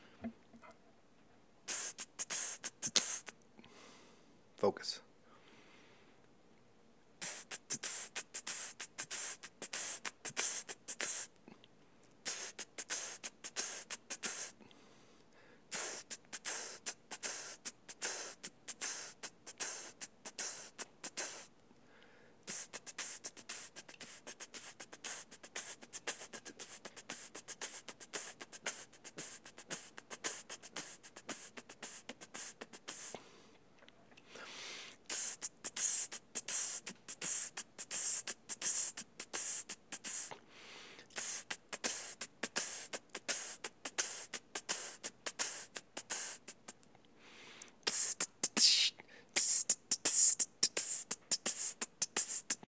An array of various hi-hat SFX. Good for beatbox loops or whatever you so please - all done with my vocals, no processing.
beatbox, drums, drumset, loop, looping, loops, tribal